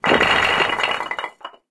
A stereo Foley of a load of kilned clay bricks falling. Or brick wall collapsing."All in all it's....."